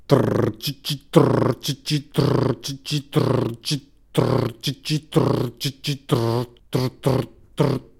Beat Box Fail Loop 60 BPM
mouth, beats, beat-box, beat, beatbox, improv, dare-19